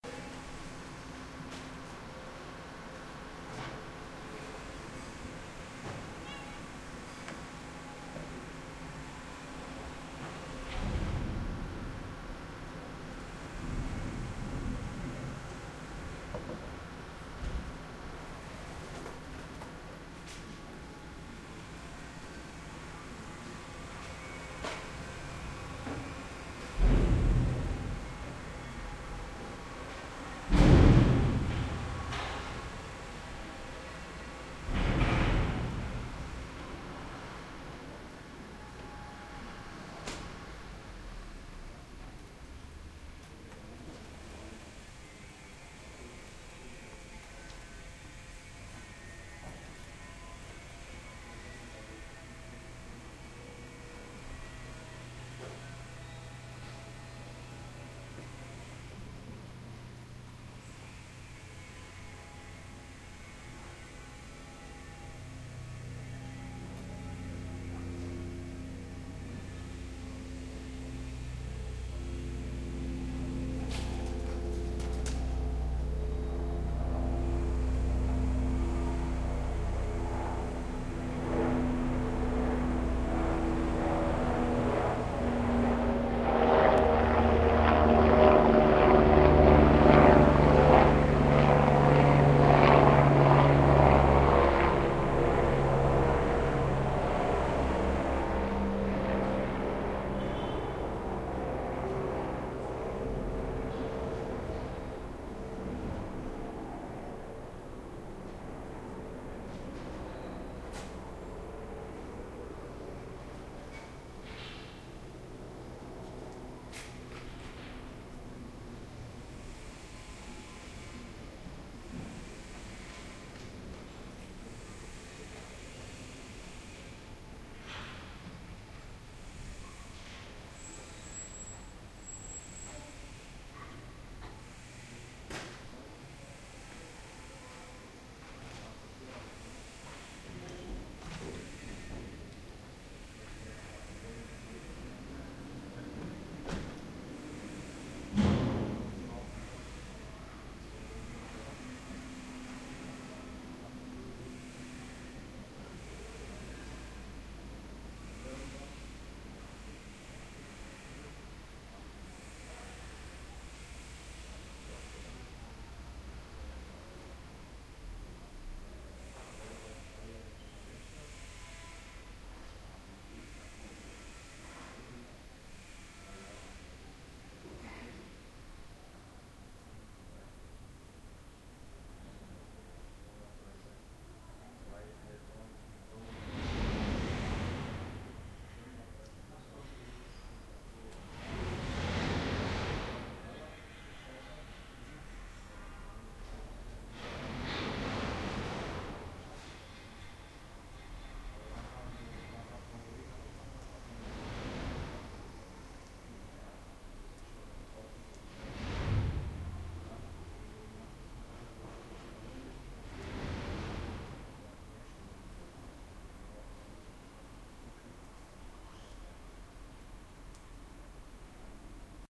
Baustelle Propellerflugzeug Glocke entfernt
Construction site, propeller-driven airplane passing, metallic impacts, some voices in the background.